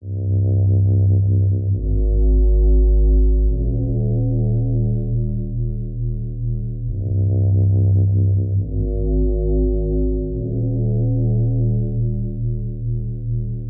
Original Bass-Middle
The original bass un-synthesized.
original, effects, bassline, sample